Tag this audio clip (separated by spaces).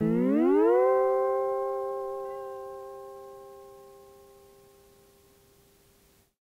collab-2 guitar Jordan-Mills lo-fi lofi mojomills slide tape vintage